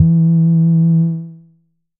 Basic saw wave 4 E3
This sample is part of the "Basic saw wave 4" sample pack. It is a
multi sample to import into your favorite sampler. It is a basic saw
waveform.There is quite some low pass filtering on the sound. There is
also a little overdrive on the sound, which makes certain frequencies
resonate a bit. The highest pitches show some strange aliasing pitch
bending effects. In the sample pack there are 16 samples evenly spread
across 5 octaves (C1 till C6). The note in the sample name (C, E or G#)
does indicate the pitch of the sound. The sound was created with a
Theremin emulation ensemble from the user library of Reaktor. After that normalizing and fades were applied within Cubase SX.
multisample, saw